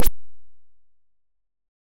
Attack Zound-110
A short snappy electronic sound effect. Suitable for clicks'n'cuts. This sound was created using the Waldorf Attack VSTi within Cubase SX.
electronic, soundeffect